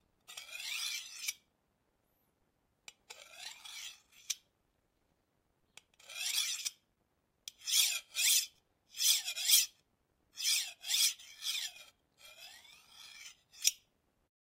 Sharpening a knife FOODTware
Knife being sharpened.